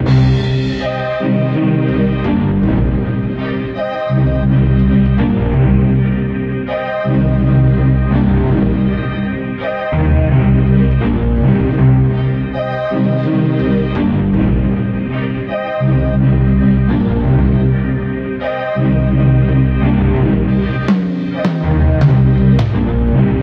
Original String loop. BPM 82